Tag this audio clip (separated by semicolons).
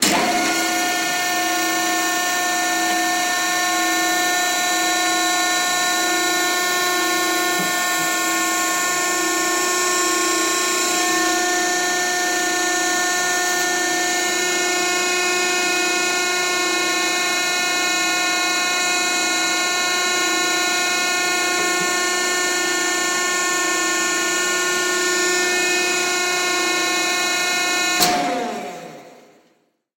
Compressor; Dumpster; Factory; Machine; Machinery; Mechanical; Sci-Fi; Sound-Effect